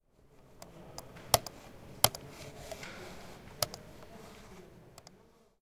The sound contains clicks of the computer's mouse and it is also hearable some background hum and some people talking far away. Because of that noise, the Edirol R-09 HR portable recorder was placed very close to the source. It was recorded insude the upf poblenou library.